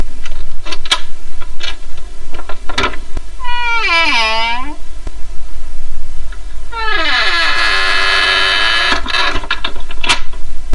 This is simple. I put key into keyhole, unlock, open door, step in, close door and lock from inside. This was recorded a year ago and the door is still creaking. Got to fix it now,
creak, door, lock, close, unlock, wooden, open